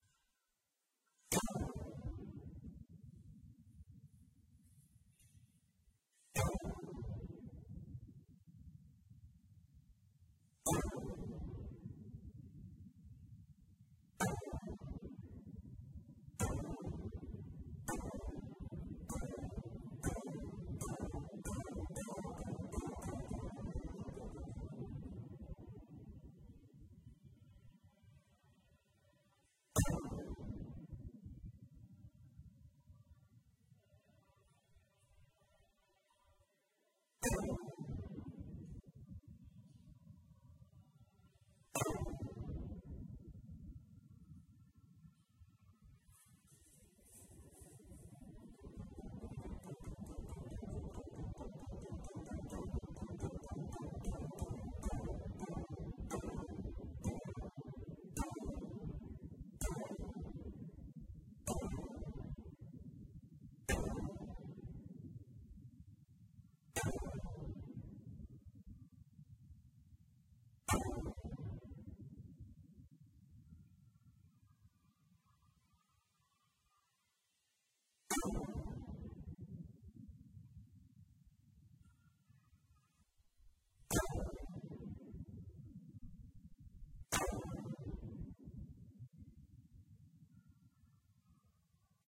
Yoyogi drum
Recording of a drum in Tokyo Yoyogi shinto temple, with Sony Mic ECM MS907 and MD Sony MZ-N710
ambient, japan, drum